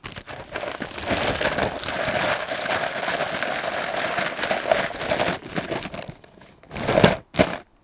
Rummaging and creaking metal
clatter, objects, random, rumble, rummage